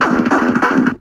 Bent Beat 01
Drum pattern glitch from a circuit bent toy guitar
Circuit-Bending, Malfunction